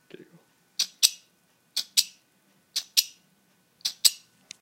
With a sparked igniting a gas torch